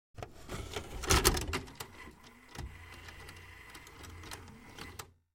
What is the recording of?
video recorder load cassette 01
Sound of a videocassette loaded in a videorecorder.
Recorded with the Fostex FR2-LE and the Rode NTG-3.
videorecorder, videocassette, recorder, insert, cassette, video, load, tape